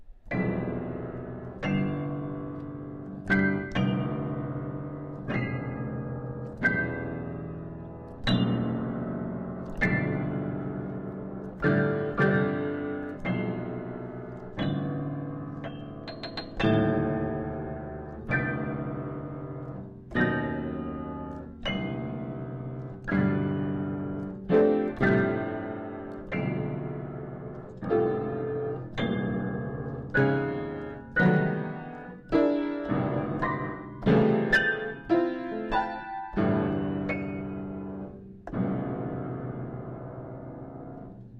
Piano playing; bad; medium distant
Recording of nonsense piano playing.
bad, playing